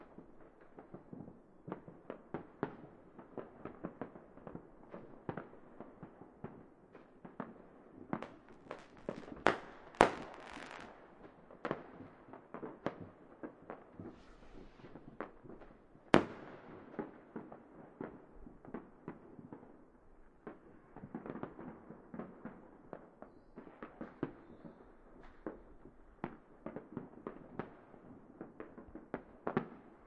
Distant fireworks, recorded with a Zoom H1.